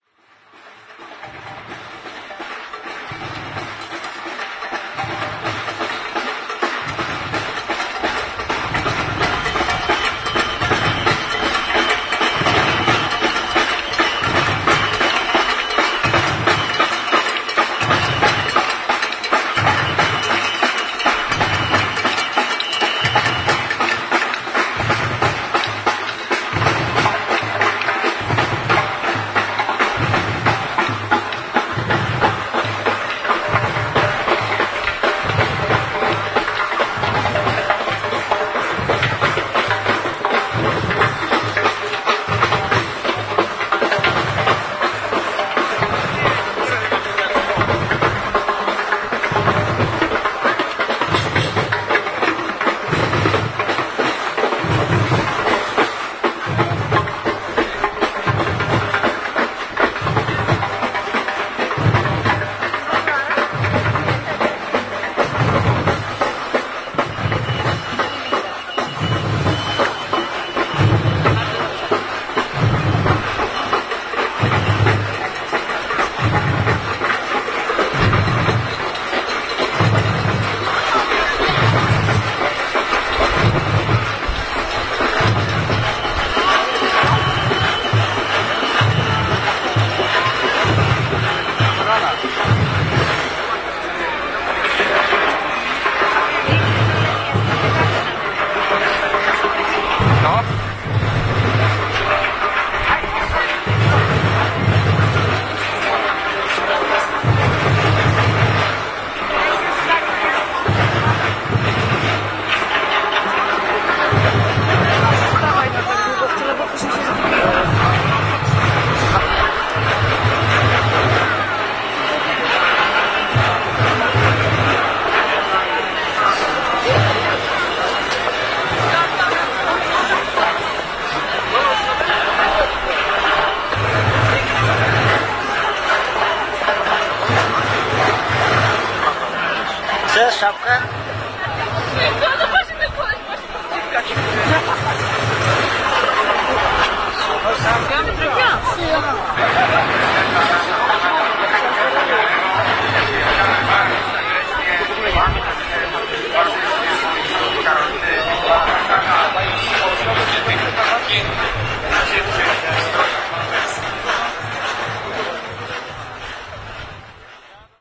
pochod idzie2
11.11.09: between 14.00 and 15.00. the ceremonial annual parade on the street Św/Saint Marcin day name